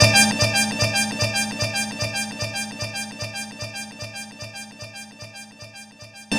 Horn Echo.R
just a simple echoing horn flare....
echo, trumpets, horns